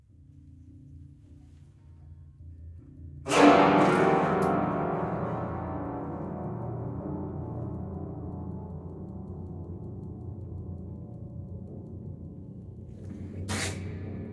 hi sting
MEDIUM RUN 2 track recording of an old bare piano soundboard manipulated in various ways. Recordings made with 2 mxl 990 mics, one close to the strings and another about 8 feet back. These are stereo recordings but one channel is the near mic and the other is the far mic so some phase and panning adjustment may be necessary to get the best results. An RME Fireface was fed from the direct outs of a DNR recording console.
fx, piano, sound, effect, acoustic, soundboard, industrial, horror, sound-effect, percussion